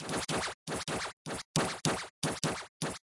bleep through delay
sound-design, bleep